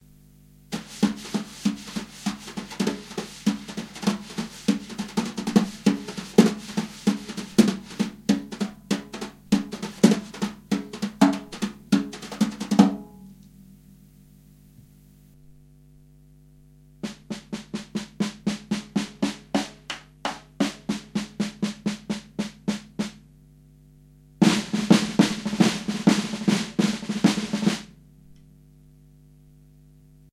Sound of vintage Lefima metal Snare with metal brushes and wood-tip sticks. Equipped with Remo Legacy LA on top and Remo Ambassador Weather King on bottom side. Sorry for the 50Hz-hum!